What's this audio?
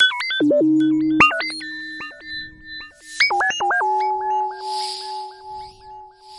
Sweeping Arp sequence
Sweeping LFO based Arp 2600 sequence